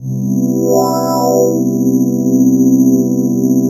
Pad chord (C-G-B-E) with wah-wah effect after bit depth reduction.
b, c, chord, e, g, pad, wah